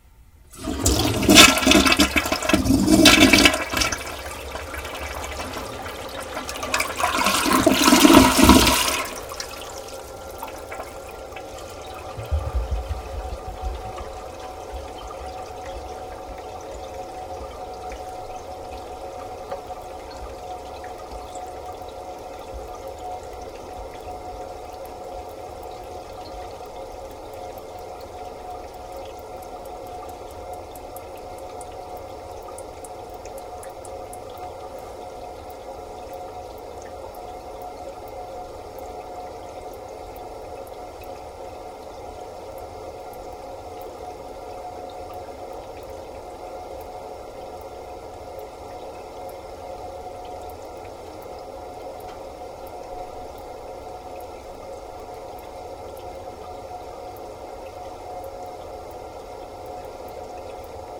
Shower Water Running Drip Toilet
drip, running, shower, toilet, water